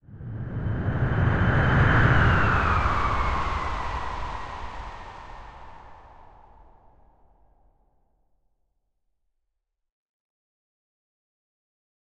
Aeroplane White Noise
Aeroplane approaching/passing by made of White Noise
aeroplane, approaching, by, noise, passing, white-noise